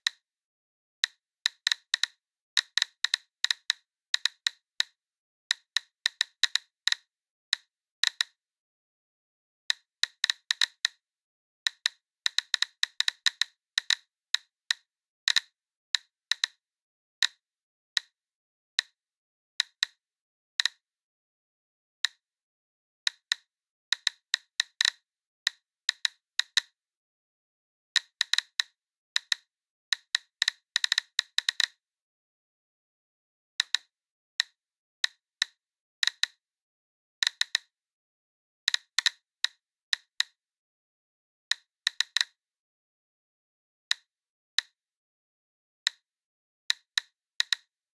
This is an edit of a recording of a real Geiger-Müller-counter, detecting normal background radiation. The reading of the Geiger-Müller-Counter was averaging at around 0,13 µS/hour (read: "micro-sieverts per hour"). The recording was then cut into eight equal-length parts and overlayed with itself, to create the sound the Geiger-Müller-counter would produce when reading 1 µS/h. This reading would still not be dangerous but concerning.
The recording was taken with two small-diaphragm condenser microphones in XY-configuration. The recorded signal was processed with a noise gate, to eliminate background hiss. No further processing was applied.